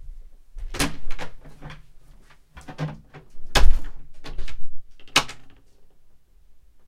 door-slam
heavy door closing sound, recorded in the studio.
bass, door, heavy, slamming, close